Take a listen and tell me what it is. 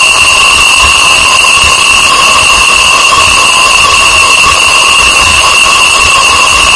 Made by importing misc files into audacity as raw data.